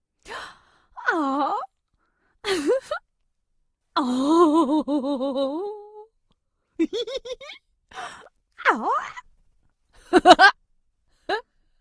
AS069238 awe
voice of user AS069238
amazement, astonishment, awe, voice, woman, wonderment, wordless